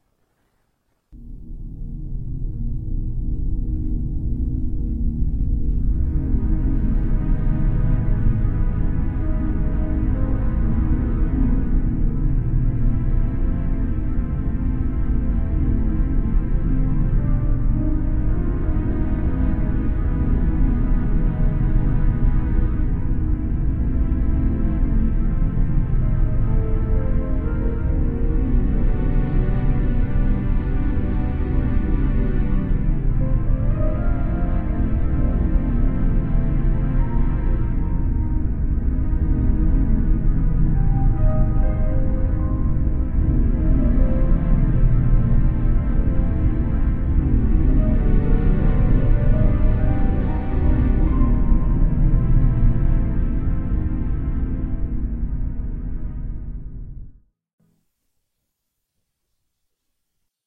Dark Ambient Synth
An dark ambient sound made with synth samples. It's dark and etheral, with a cyberpunk flavour added.
Sounds came from a Kurzweil SP4-8 keyboard, Legowelt's free samples. Recorded on Audacity.